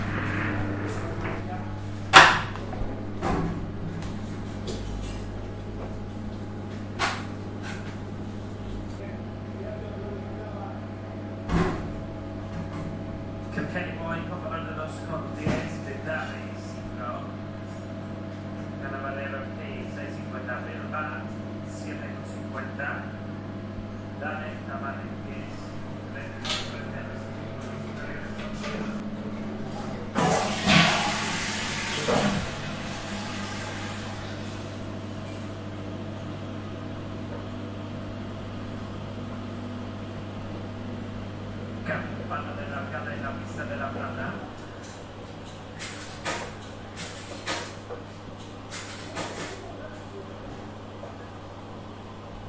aseos sala juegos 2
Sounds flush and typical sounds of bets. Interior bathroom of a gameroom.